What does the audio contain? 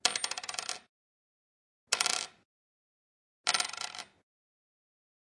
Dropping ring on table
Go crazy! Use it for anything you like, but do drop me a line and tell me how you're using it! I'd love to hear.
Dropping a metal finger band ring 3 times onto my wooden dining room table. Could be interesting for musical applications e.g. textures in a drum loop, or even foley work.
Microphone: Zoom H4N through the onboard stereo mics.
Processing: Only a gentle highpass at 50 hz.